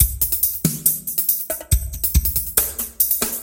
70 bpm drum loop made with Hydrogen
electronic, beat